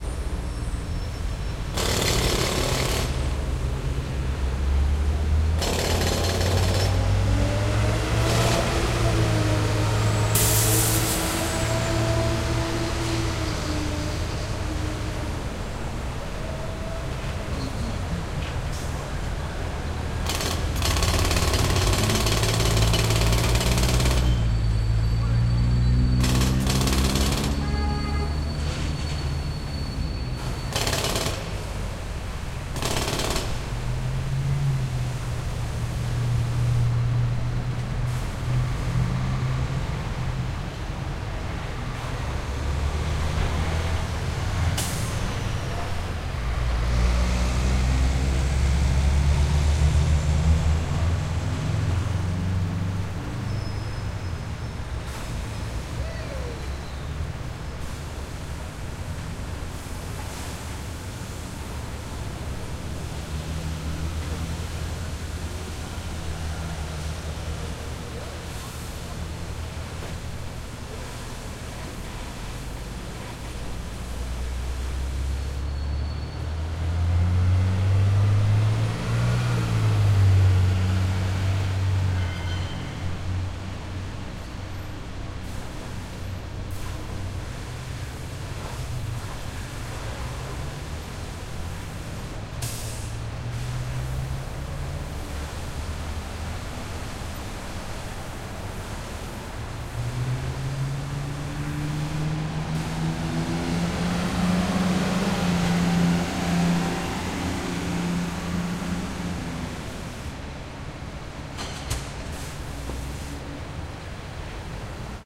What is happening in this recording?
file0138edit LA1031060820jack
10/31/6 8:20am downtown los angeles - recorded from 4th story window near intersection where jackhammer was in use - buses and traffic pass by, also water spray cleaning of fire escape can be heard
buses, city, downtown, field-recording, jack-hammer, traffic